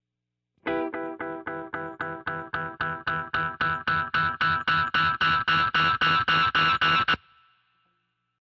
dub ringtone
Analog delay on fender strat through fender blues deluxe in bm.